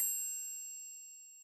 Just a little bell, good for catoon scenees that have an object shinning
bell, cartoon, ding, ring, shine, ting